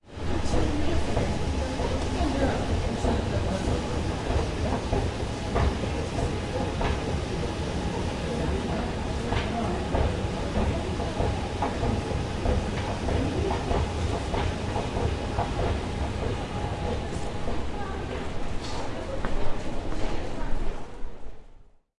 Walking outside, pants rustling.